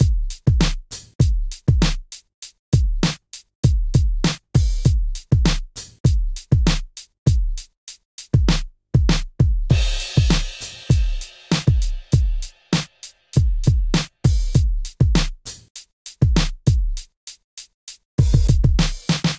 hip hop drum loop by Voodoom Prod created with Logic Pro